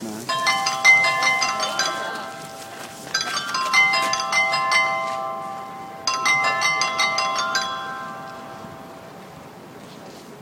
Dresden Zwinger chimes
This sound is a part of Zwinger chimes in Dresden. Every 15 minutes the Zwinger clocks plays part of melody.
dresden, field-recording, zwinger